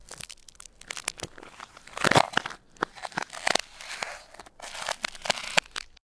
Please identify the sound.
Ice 10 - reverse
Derived From a Wildtrack whilst recording some ambiences
BREAK, cold, crack, effect, field-recording, foot, footstep, freeze, frost, frozen, ice, snow, sound, step, walk, winter